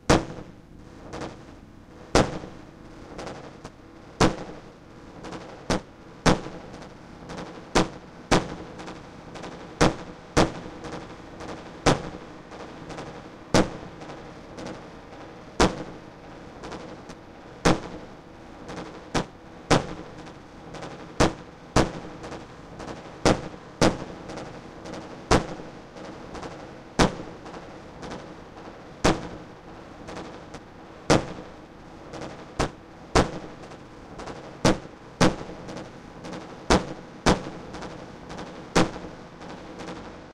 dub drums 007 snaredelayz
up in space, echomania
experimental, reaktor